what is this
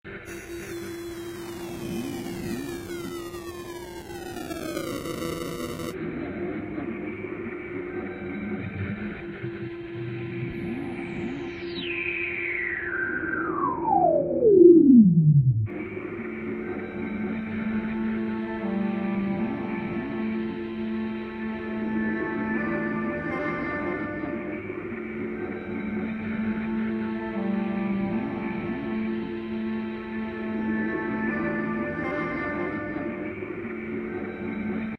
I am a Fine artist and do moving image art films. My sounds are mostly on the level of sound art and bizarre sometimes interesting stuff. Please use all of my sounds for whatever and whenever.
Ritual